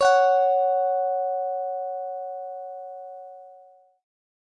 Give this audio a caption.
guitar tones 004 string G 18 tone CIS5+5
This is one note from my virtual instrument. The virtual instrument is made from a cheap Chinese stratocaster. Harmonizer effect with harmony +5 is added
electric, fender, guitar, instrument, notes, samples, simple, simplesamples, stratocaster, string, strings, virtual, virtualinstrument